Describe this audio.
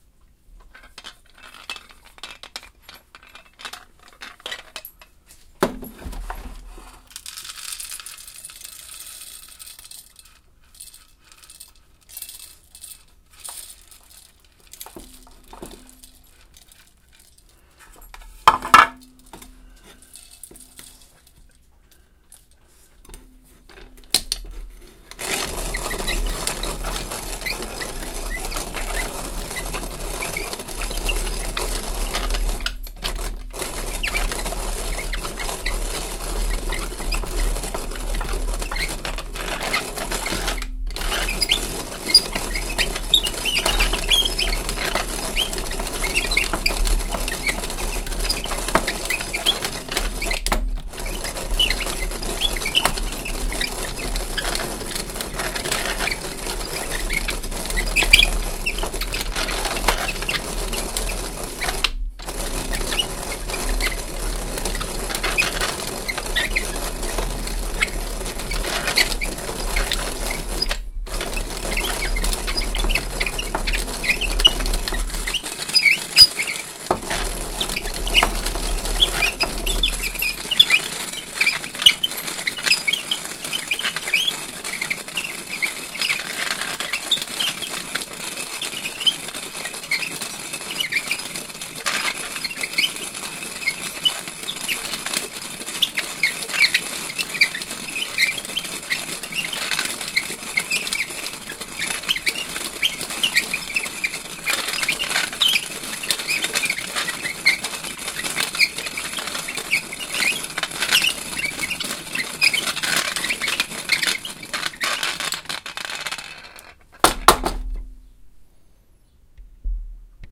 Antique Manual Coffee Grinder
Antique hand coffee grinder grinding 35g Starbucks Espresso beans.
coffee-grinder, vintage, hand-operated, mechanical, antique, mechanism